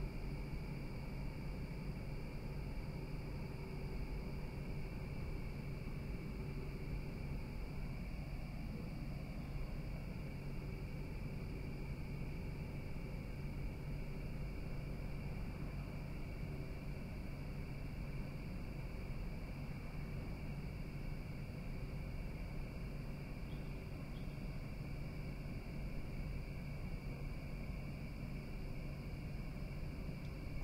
Oustide Night
night, outside